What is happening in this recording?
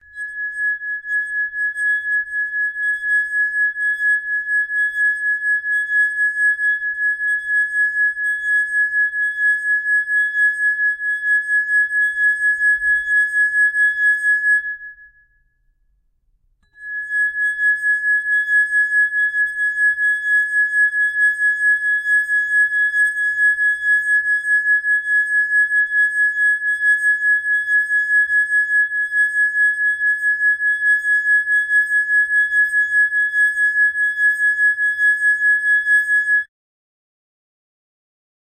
20 Tehoste lasinsoitto9
Playing a water glass, a very high pitched, soft sound
glass, ringing, resonance, wineglass